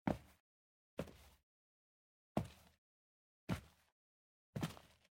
WoodType 1 Footstep Sneakers
Recorded with 1 AKG-C414 in cardioid pattern.
Typical Generic runners on wooden floor (wood unknown)
Cheers, Monte
floor, Foley, wooden, Movement, wood, feet, walking, Foot, walk, steps, footsteps